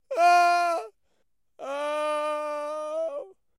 Sad cry 9
Just so sad about something.
Recorded with Zoom H4n